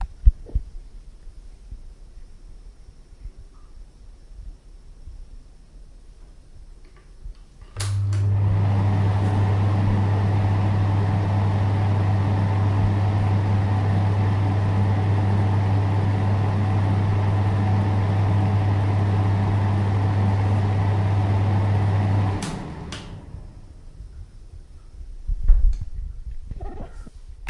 ZOOM0019 Toilet Fan in Hotel Room
Stayed in a hotel in the Island of Wight in the UK. This was the sound of the fan in the hotel room toilet. Recorded with a Zoom H1.
fan, hotel-room